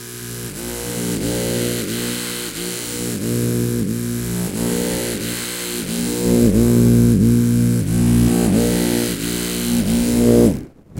ORAL BRASS
Toothbrush Oral B
Open, Rec, House, Loop, Bass, Multisample, Sample, Trash, Field, Off-Shot-Records, Home